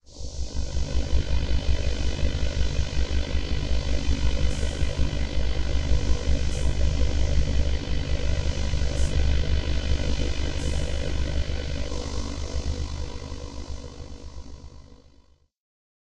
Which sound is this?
Street sweeper granular pad + noise
granular synth layered with filtered noise of street sweeper.
source files: